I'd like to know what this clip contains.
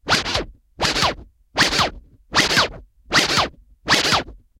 Simple vinyl record scratches using a turntable.